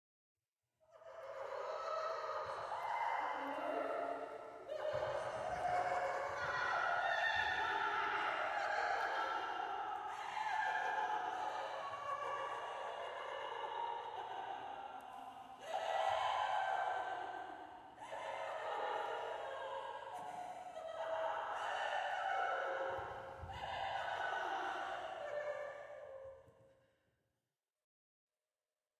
female laughter
female laugh crazy with reverb